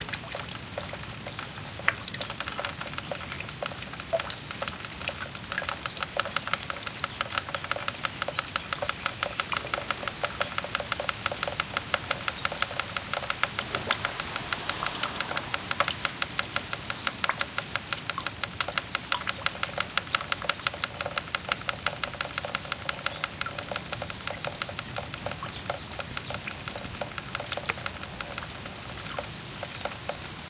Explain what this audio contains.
The Sound of heavy rain pouring down a window.
ambience, dripping, field-recording, raindrops, drip, weather, drops, rain, rain-drops, pouring, window, raining, water